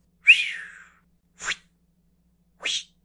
throw item - whoosh (cartoon)
me going "woosh phewww fweeeew" for a character throwing something and waving their arms around
cartoon, exaggerated, funny